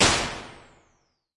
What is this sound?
Alesis Microverb IR Small 3
Impulse response of a 1986 Alesis Microverb on the Small 3 setting.